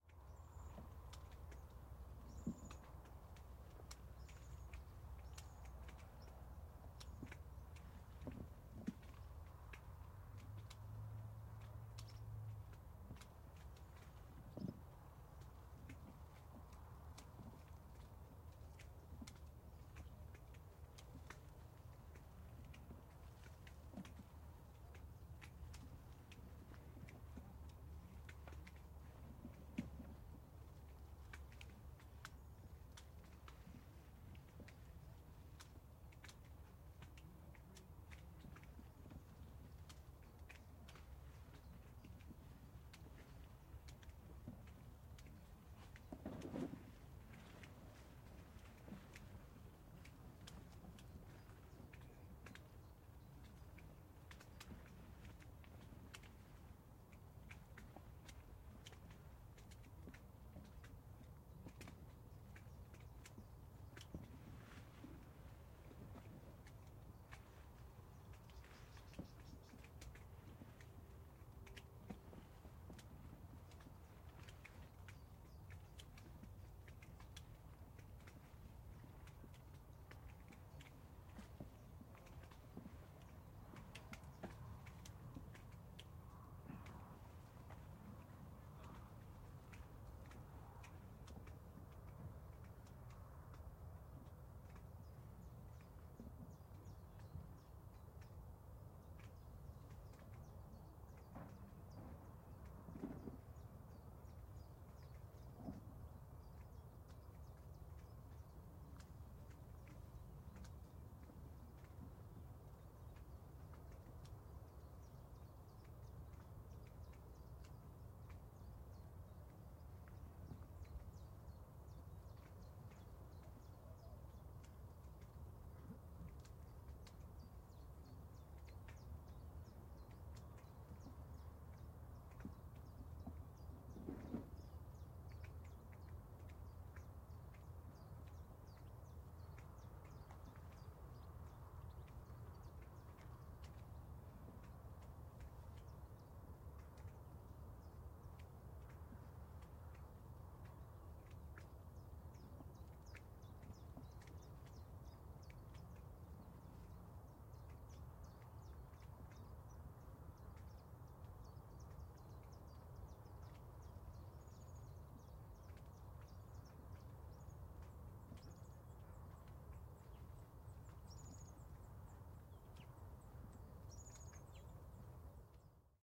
Ambience in a Orange field